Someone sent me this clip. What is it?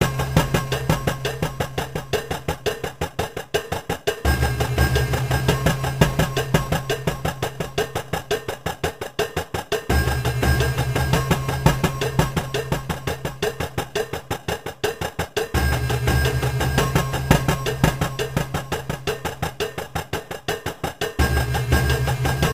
Slower version of the other two bitcrushed breaks.